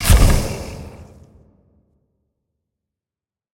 Wet Spell shoot
Spell shoot sound effect